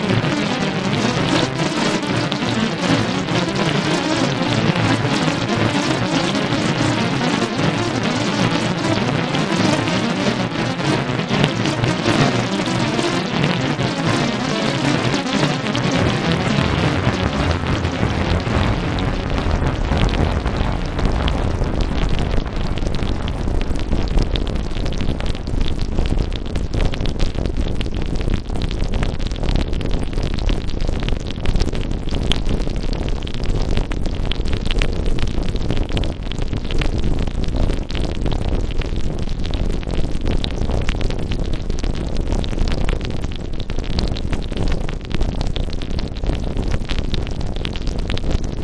synthinablender lava
Granular synth interpretation of a synth in a blender dropping in frequency into a great lava atmospheric sound.